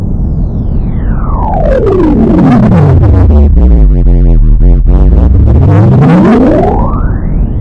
The starship engine throttles down in order to make a tight maneuver. It throttles back up while adding a slight boost fuel. Created with Audacity.